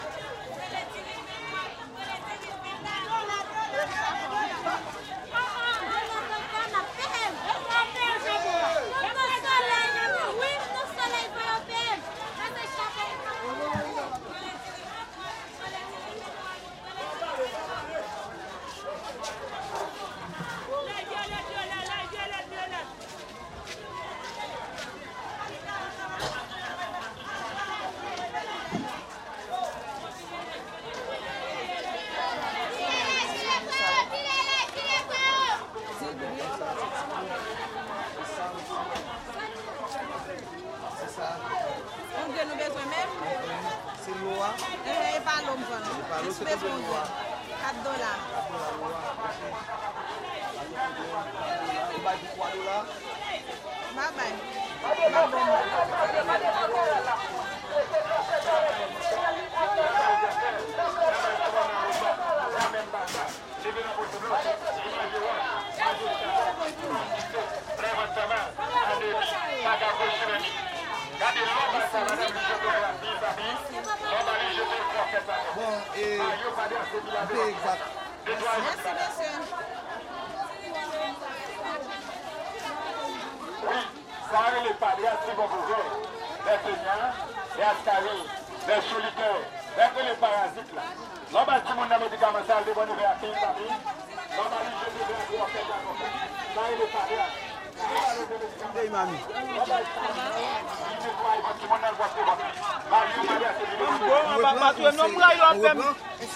crowd int medium busy Haitian man on megaphone
busy, crowd, int, medium, megaphone